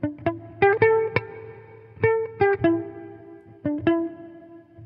electric guitar certainly not the best sample, by can save your life.
guitar, electric